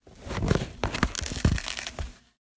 handle-tear-cardboard-box
10.24.16: Handling and slightly tearing an empty cardboard box (formerly a 12 pack of soda)
eat, cardboard, hit, break, board, box, drum, pack, carton, card-board, card, natural, tear, crunch, handle, foley, rip, package